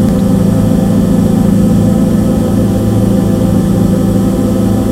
Created using spectral freezing max patch. Some may have pops and clicks or audible looping but shouldn't be hard to fix.
Everlasting, Sound-Effect